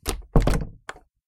wood door close
Closing an old wooden door from the 1800s.